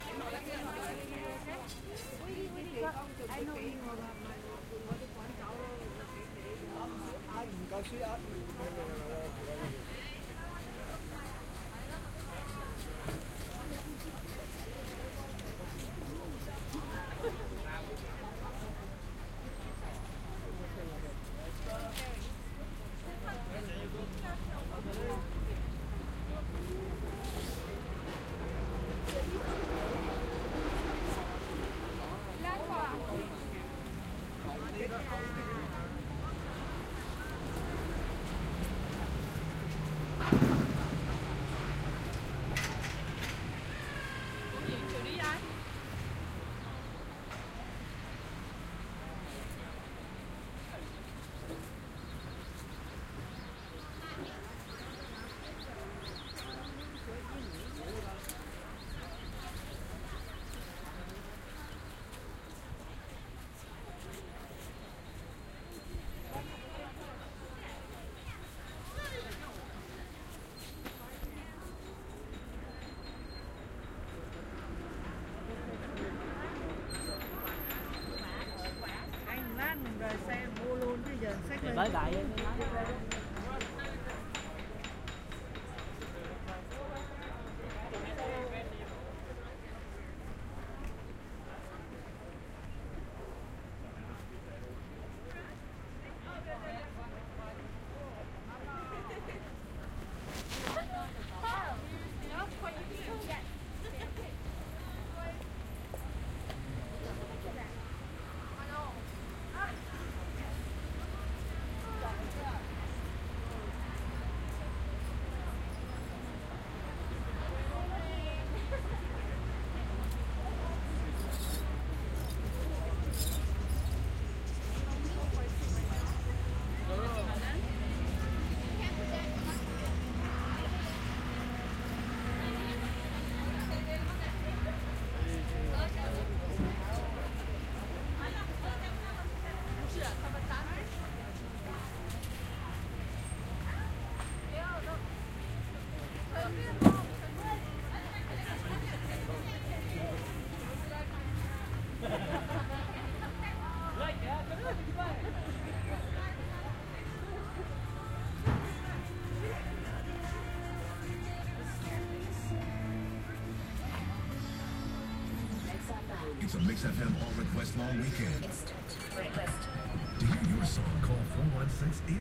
toronto chinatown
Walking through Chinatown in Toronto, past lots of street vendors and crowded streets.Recorded with Sound Professional in-ear binaural mics into Zoom H4.
field-recording, people, traffic, canada, crowd, street, city, noise, binaural, toronto, phonography, outside